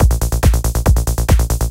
TR LOOP - 0514
goa goa-trance goatrance loop psy psy-trance psytrance trance